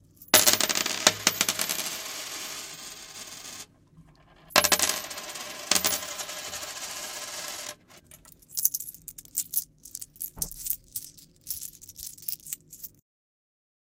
Este arquivo reproduz o som de diversas moedas caindo em uma superfície plana e o som de moedas sendo balançadas em uma mão fechada.
Microfone Condensador AKG C414
Gravado para a disciplina de Captação e Edição de Áudio do curso Rádio, TV e Internet, Universidade Anhembi Morumbi. São Paulo-SP. Brasil.